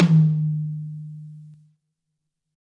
High Tom Of God Wet 007
drum, drumset, high, kit, pack, realistic, set, tom